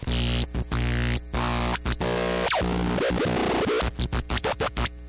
A basic glitch rhythm/melody from a circuit bent tape recorder.
lofi, power, glitch, hum, electricity, noise, bent, electronic, circuitbending, melody